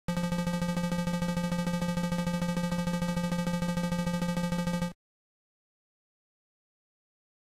Text Scroll F2 2 200
A low-pitched, soft F pluck in the pulse channel of Famitracker repeated continually to show text scrolling
8-bit, dialog, dialogue, low, retro, scroll, soft, text